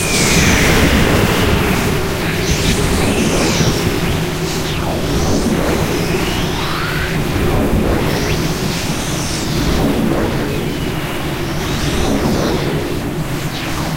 A rasengan sound created with my mouth and some wind recordings.
spell, Naruto, fantasy, ball, energy, raikiri, chidori, sphere, rasengan, element, wind, Uzumaki, blowing, Minato, air, Shippuuden, magic